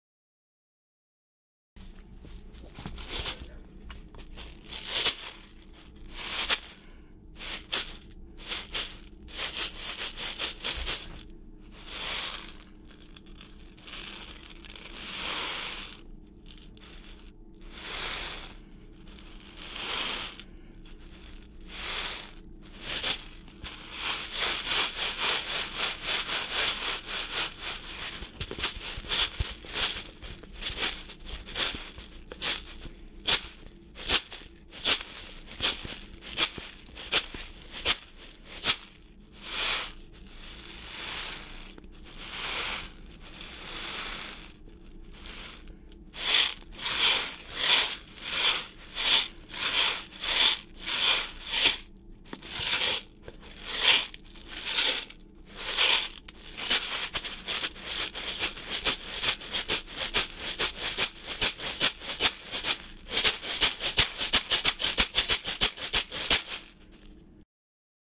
Gravado em estúdio feito em garrafa de água contendo alguns grãos de arroz.
Recording in studio made with a bottle of water containing some grain of rice.
Gravado para a disciplina de Captação e Edição de Áudio do curso Rádio, TV e Internet, Universidade Anhembi Morumbi. São Paulo-SP. Brasil.
Recording for the discipline Captation and Edition of course Radio, Tv and Internet from University Anhembi Morumbi. São Paulo-SP/Brazil.